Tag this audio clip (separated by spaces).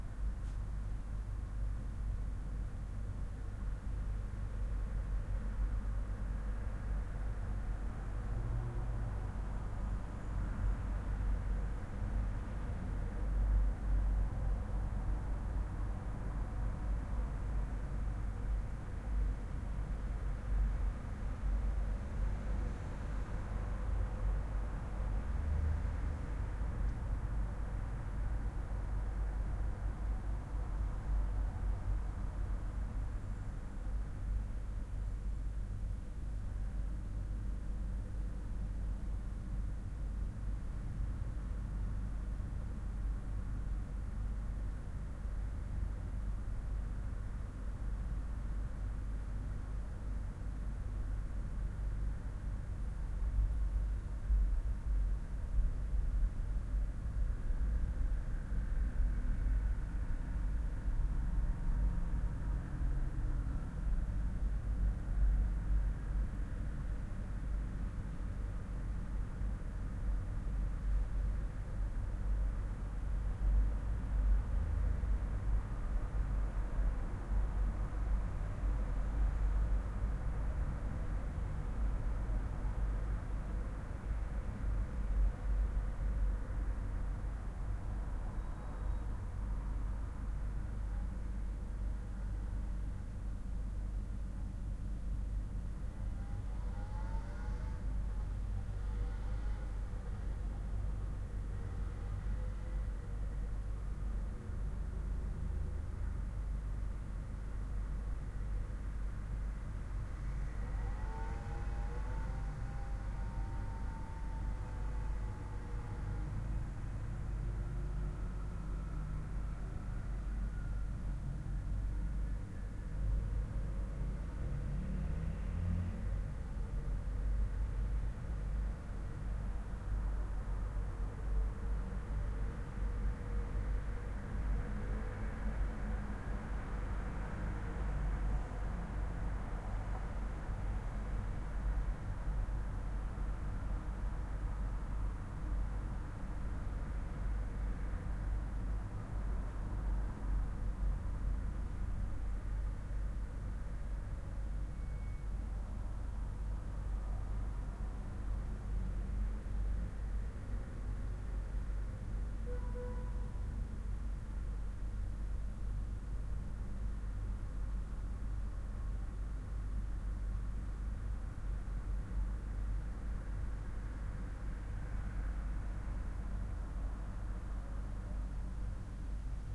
Roomtone City Interior Traffic Paris Ambiance